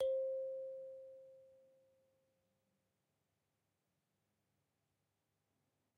I sampled a Kalimba with two RHØDE NT5 into an EDIROL UA-25. Actually Stereo, because i couldn't decide wich Mic I should use...
african, c, kalimba, nature, pitch, short, sound, unprocessed